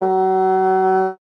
fagott classical wind
classical, wind, fagott